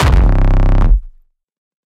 Hardstyke Kick 12
bassdrum, layered-kick, Hardcore, Hardcore-Kick, distorted-kick, Hardstyle, distrotion, Rawstyle-Kick, Rawstyle, Hardstyle-Kick, Kick